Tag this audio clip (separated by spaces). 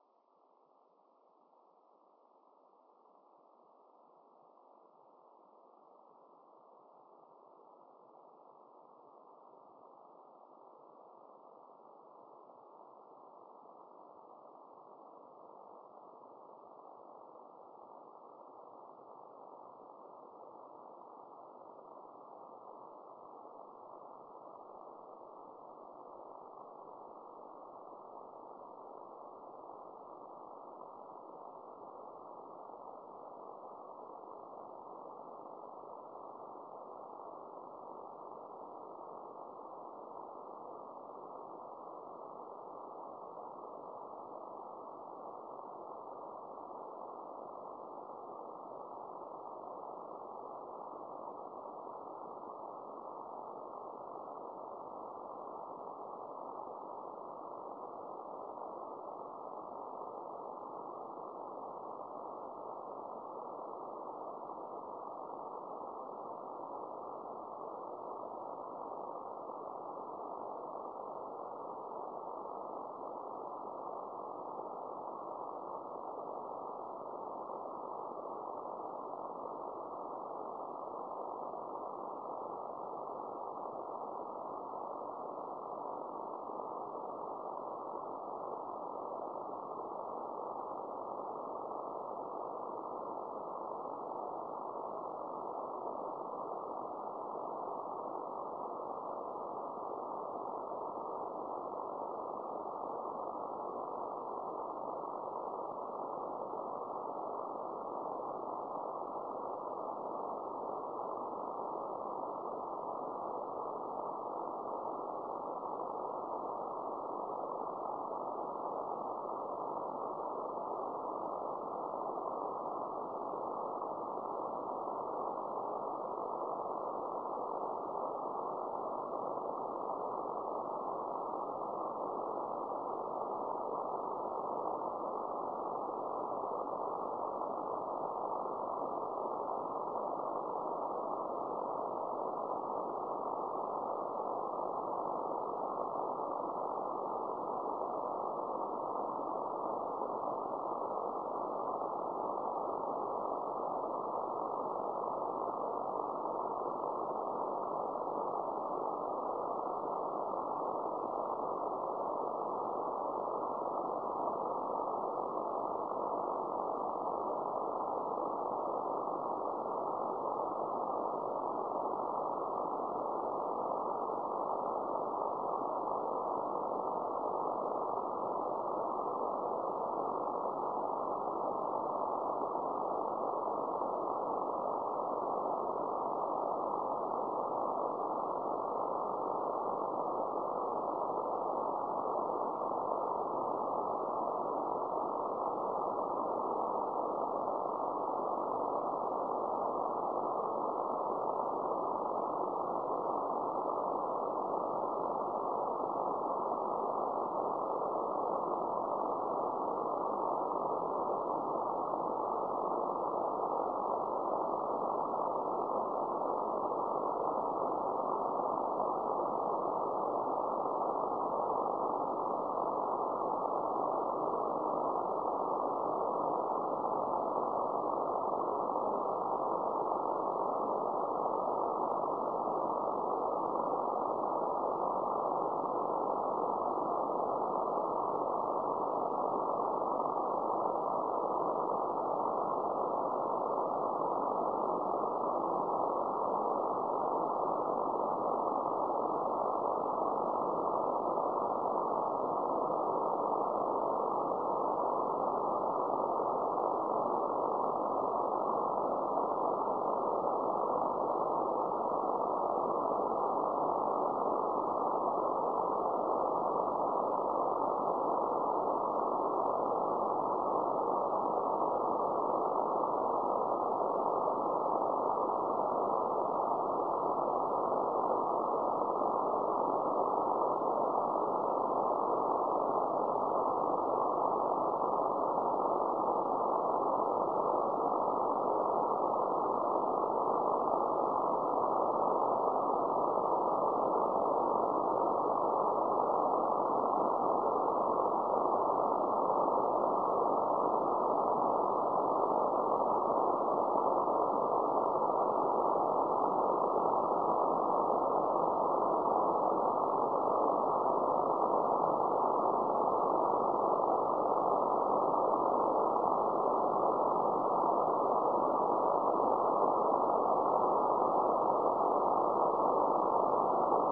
ao-7 fountain satellite